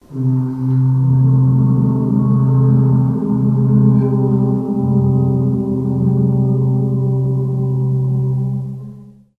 Group of people chanting oooh
Recorded using portable digital recorder